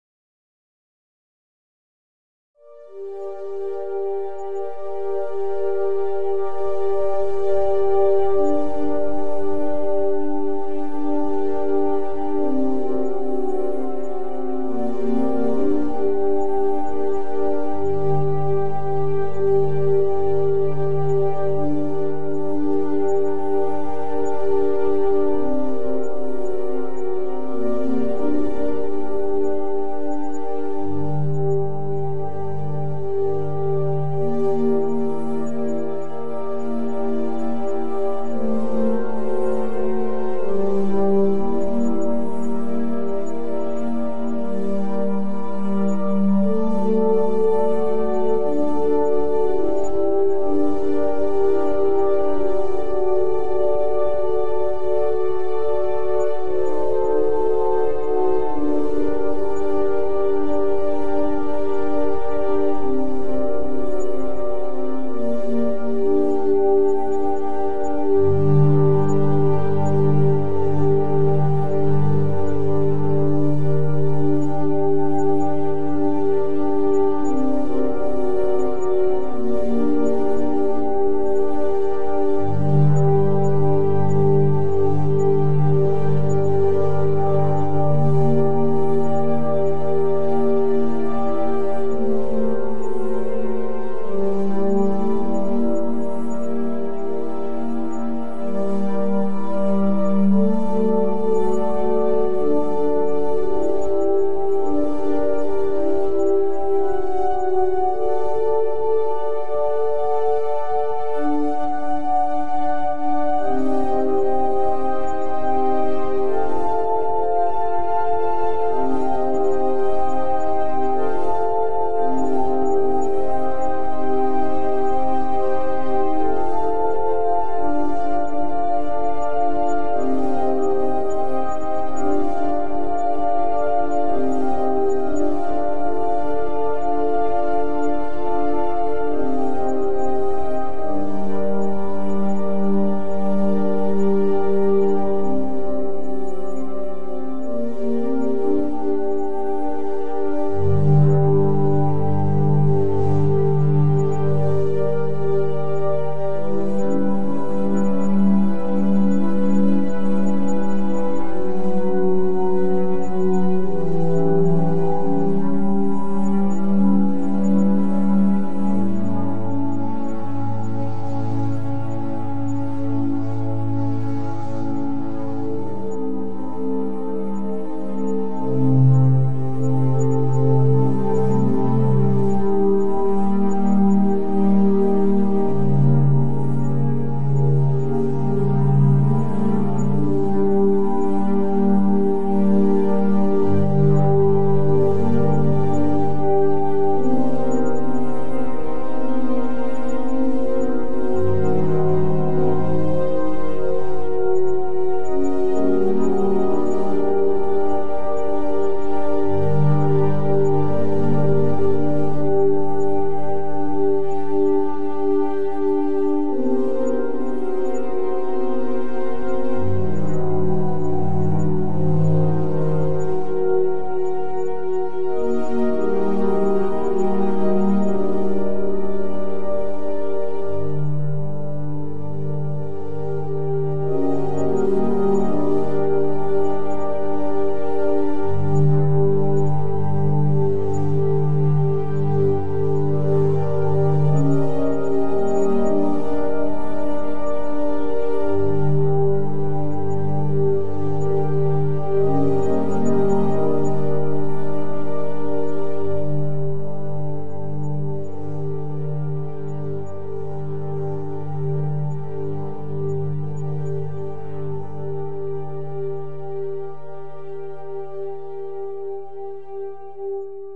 Rachmaninoff Barcarolle on Airy Synth Pad
The moderato part of Rachmaninoff's Barcarolle played by yours truly on Suzuki electric piano with midi to Music Maker daw Cinematic Synth virtual synthesizer.
ambience, ambient, atmosphere, Barcarolle, cinematic, classical, dark, eerie, ethereal, funereal, ghostly, horror, moody, music, Rachmaninoff, soft, soundtrack, synth, synthesizer, tragic, weeping